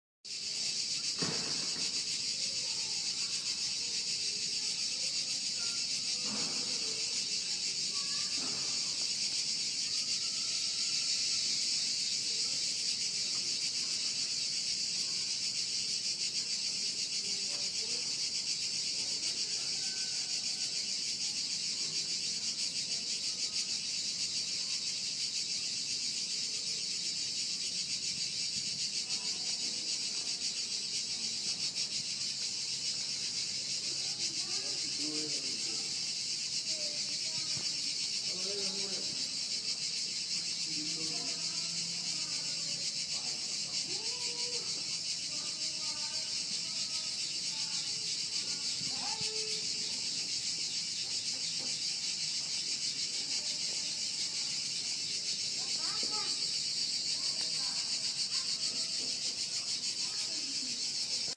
Cicadas Street Atmos and Apartment Voices Murano
Cicadas, street, people, murano